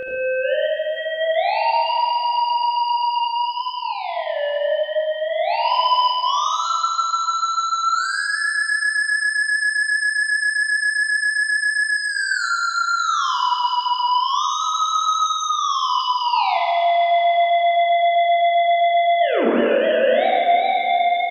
theremin3verbdelay
Added to delay to the reverb.